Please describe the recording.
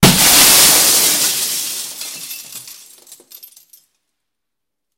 Windows being broken with various objects. Also includes scratching.